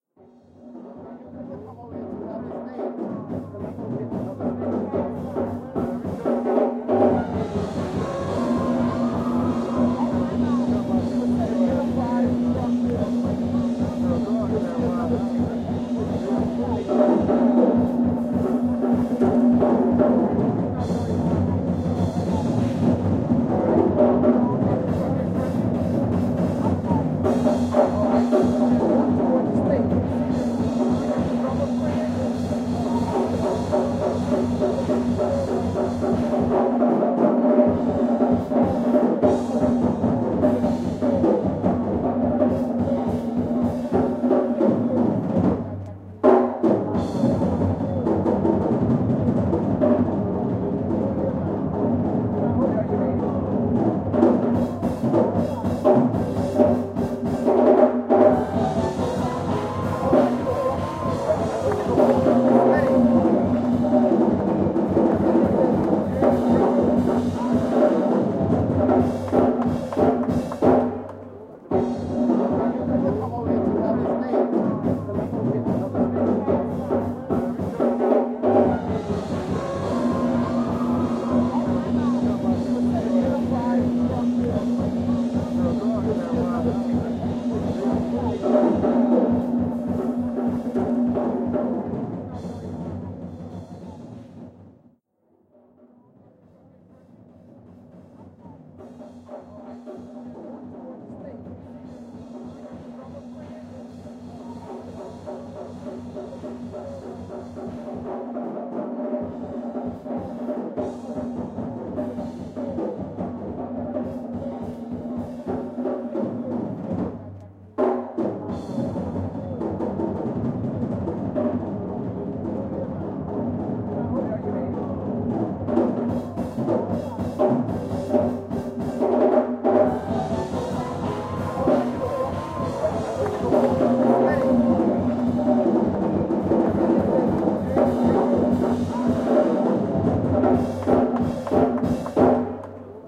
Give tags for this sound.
drums
jazz
Orleans

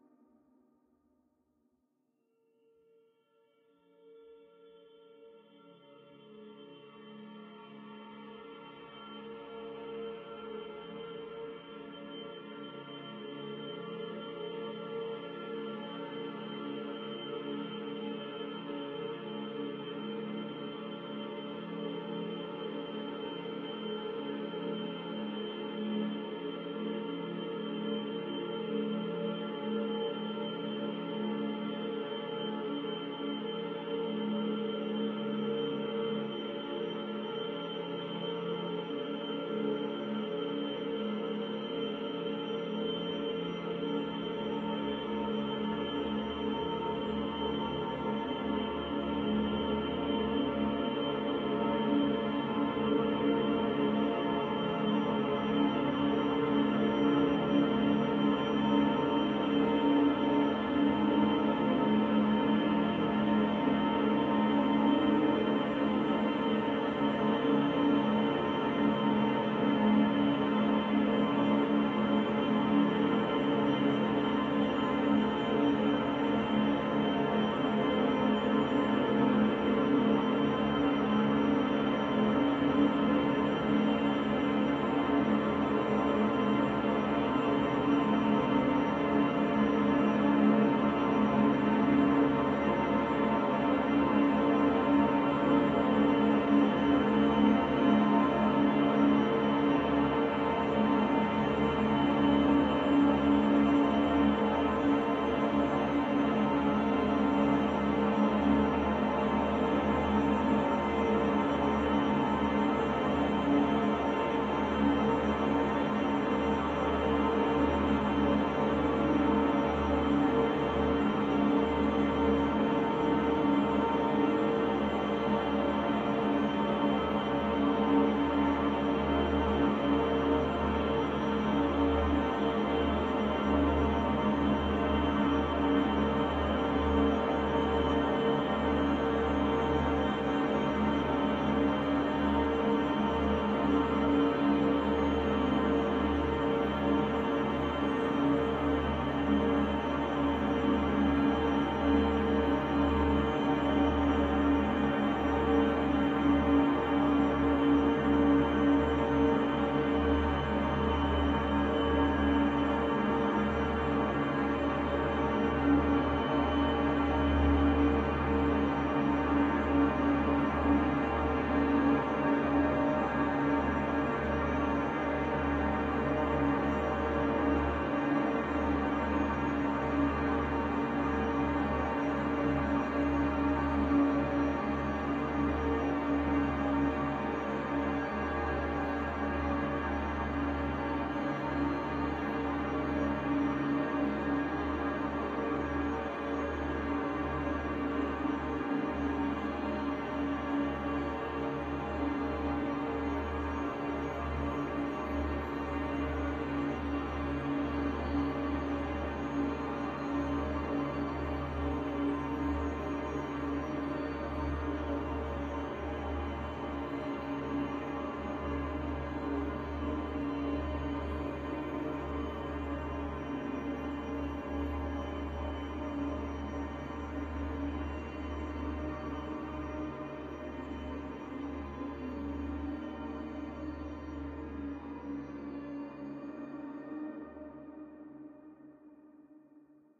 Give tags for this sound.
evolving
dream
multisample
divine
soundscape
drone
sweet
pad
experimental

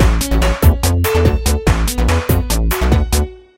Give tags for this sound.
flstudio
techno

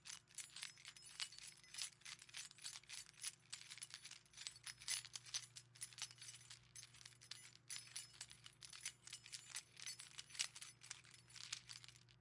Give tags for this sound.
chains
keys
jingling
keychain

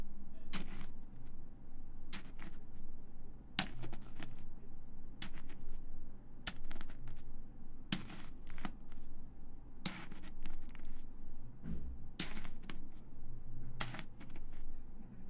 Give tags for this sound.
beat
box
cart
n

